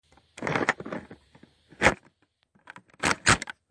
Gun Reload
This is the sound of a gun of any type reloading. It features a mag release, mag reload, and a bolt cock. Read my sound signature before downloading!
Click, Firearm, Gun, Magazine, Reload